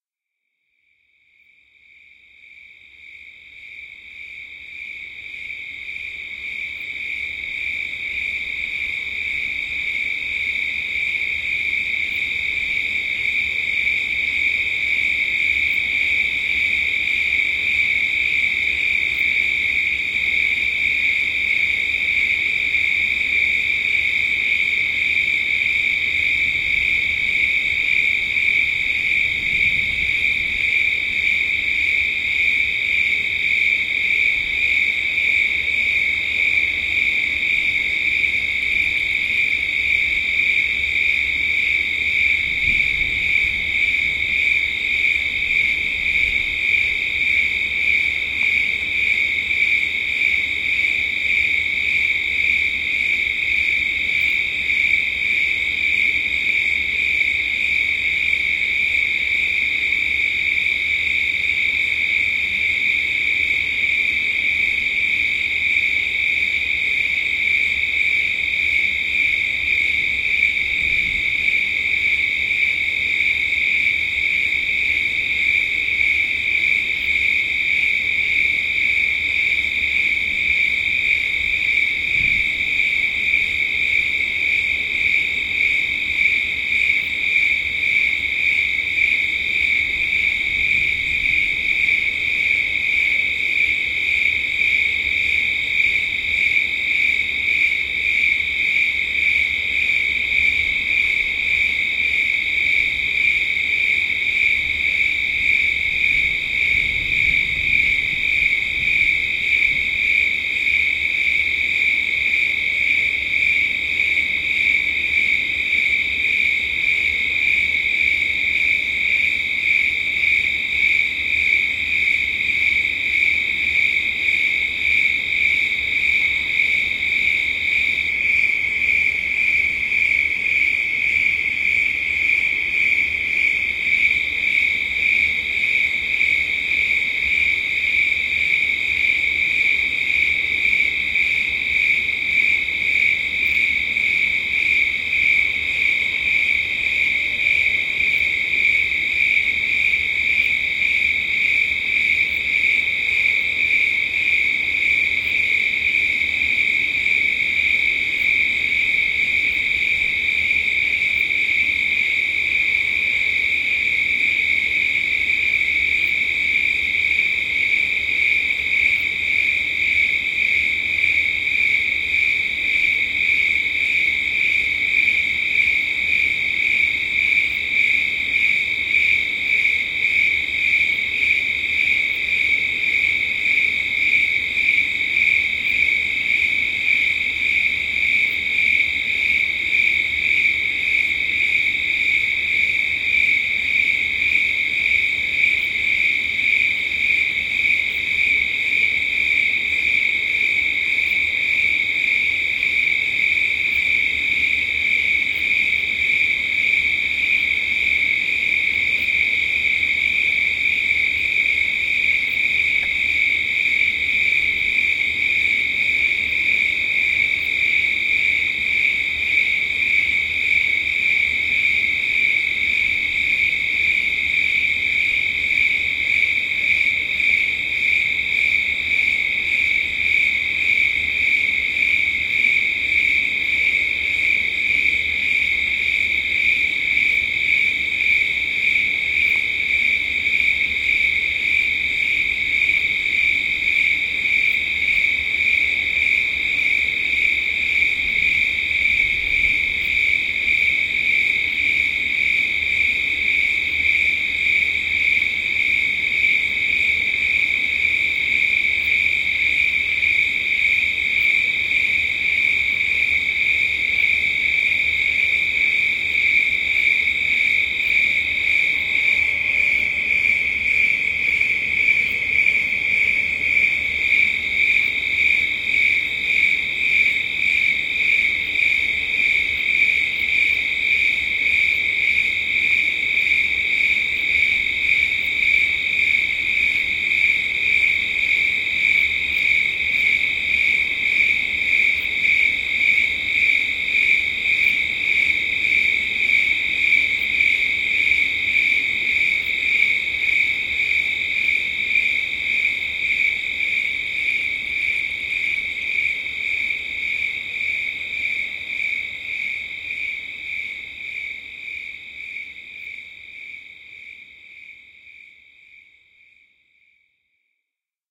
sherman 29aug2009tr06
ambient, california, sherman-island